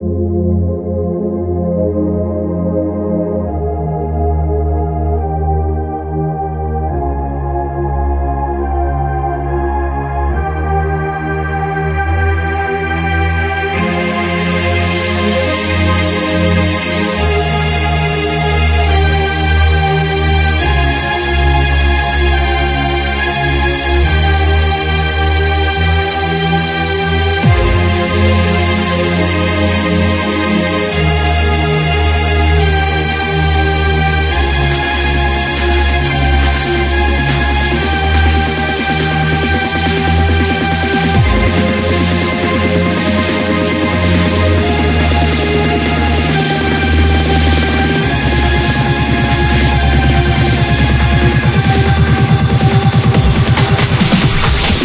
Huge Trance Progressor
pads, progressive